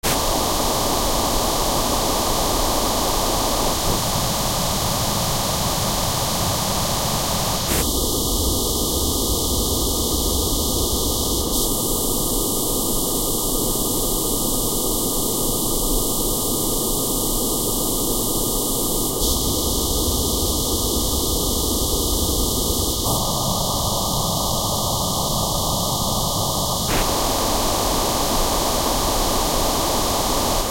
friend face
I took one my friend's profile photos and put it in the spectrogram. It sounds like under all the noise there is an ambient type music waiting to burst.